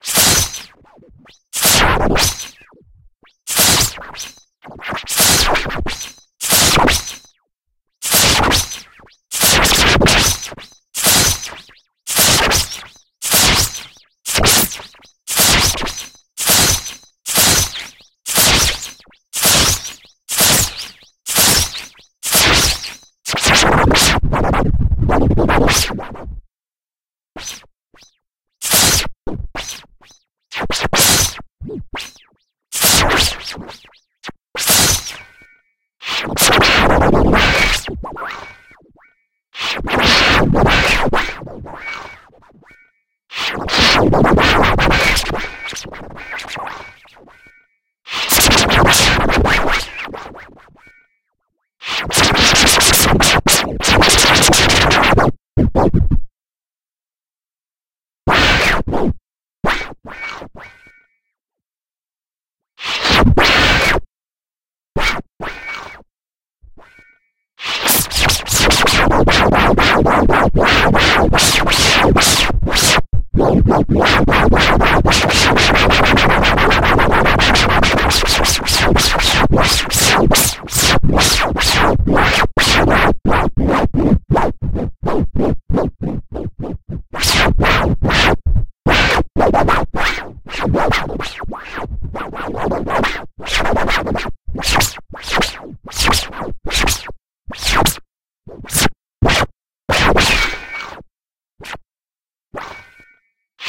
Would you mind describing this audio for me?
I finally found out that analog X's scratch application has a fader of sorts, more of a mute in the form of the right mouse button. I looked up the different "scratch" techniques and tried to make the software emulation more realistic with some simulated mixer fader action and used some different source files to scratch. These are the raw master files rendered direct to disk with little processing.
dj, scratch, vinyl